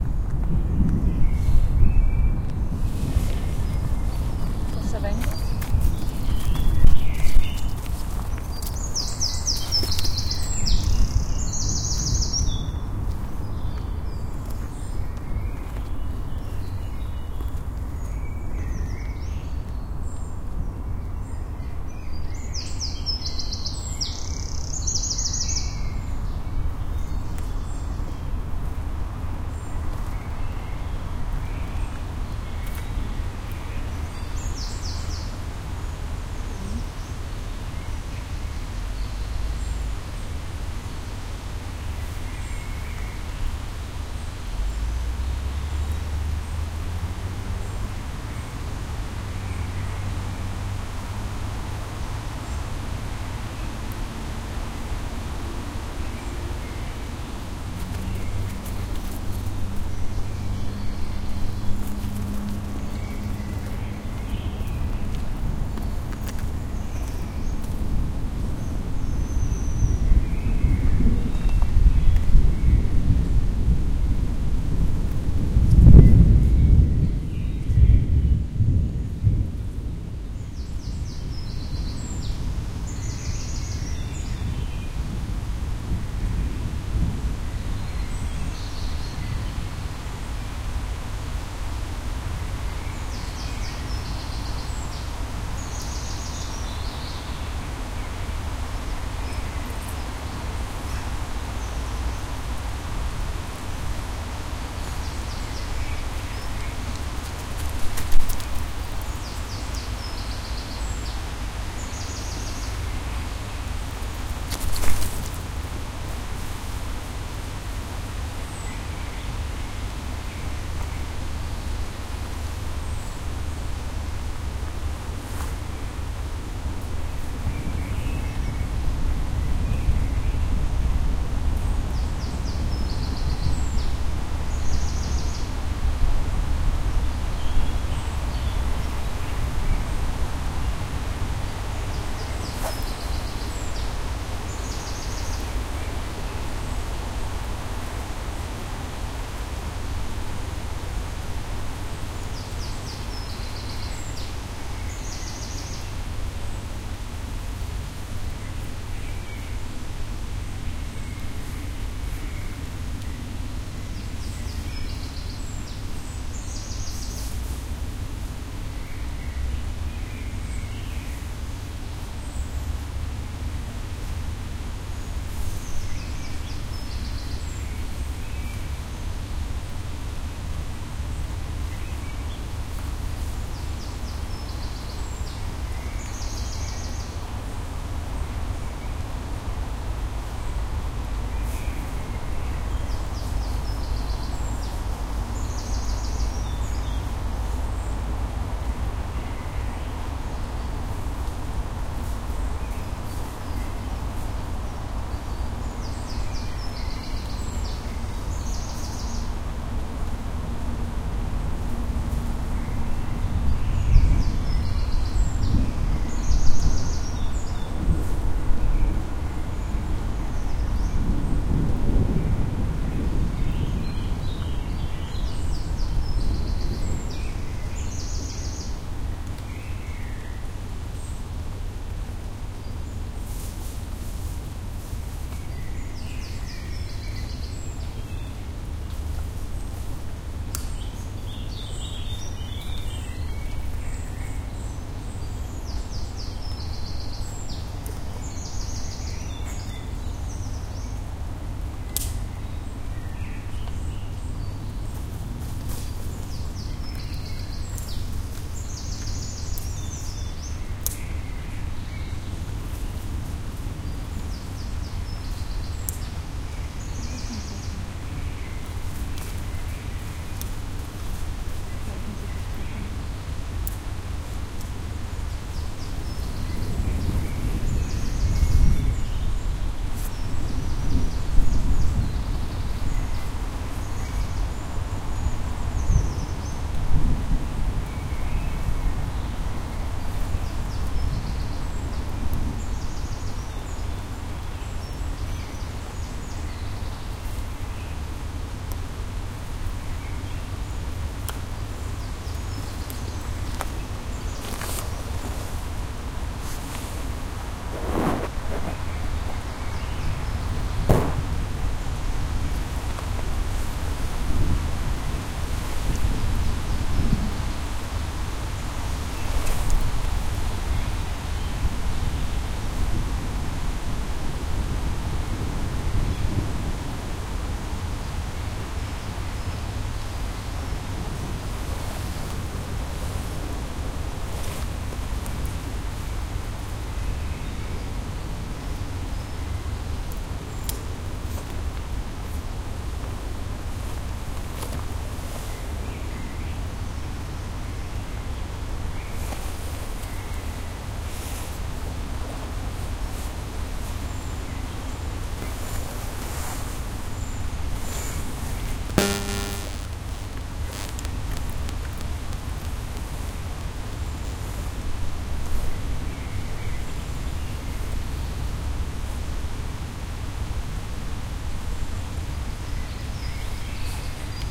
Took a walk with my dog Jess at Dawsholm Park in Glasgow. She is a Sprocker Spaniel puppy and at time of recording she was just over 1 year old. She loves an adventure and to rummage about in the bushes. Most of these recordings are of the parks ambience and wildlife with birds tweeting and the like but you will hear her rummaging about on occasions and zooming past the mic. We were also walking in the rain and during a thunderstorm so you will hear rain, hail and the lovely rumblings of thunder.
All recordings made with a Tascam DR-05 at various locations throughout the park